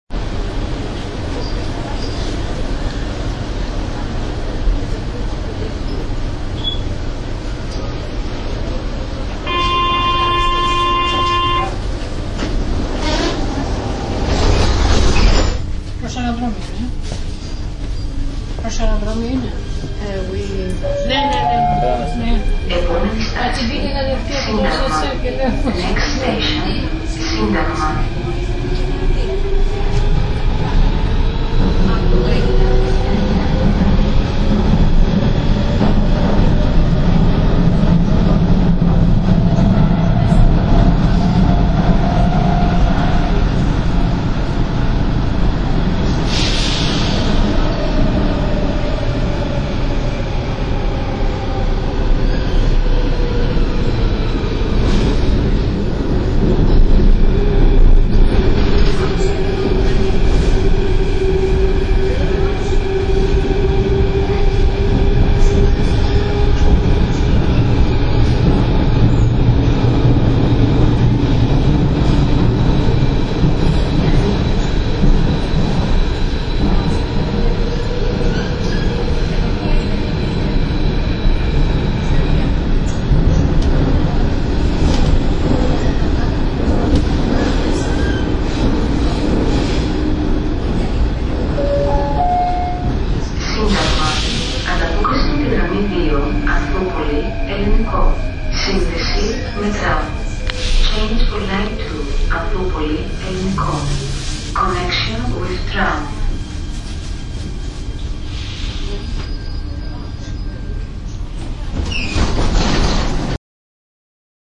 athens metro
athens, greek, metro